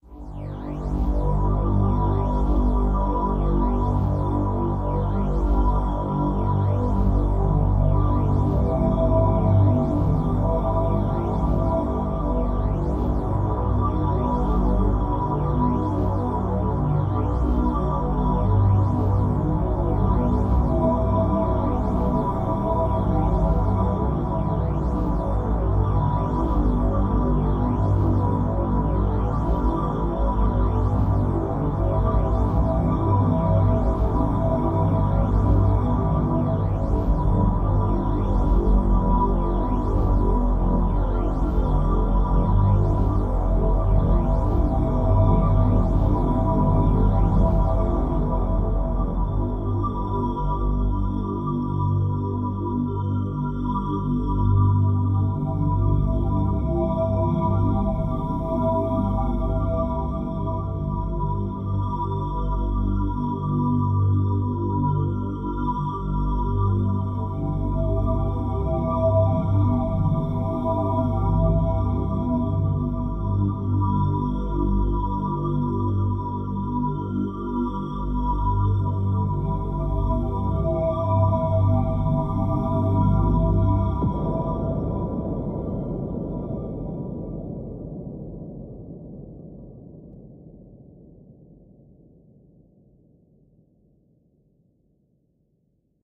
CWD LT solace intro
space, drone, sci-fi, soundscape, dark, ambience, ambient, science-fiction, fx, melancholic, atmosphere, sfx, deep, cosmos, pad, epic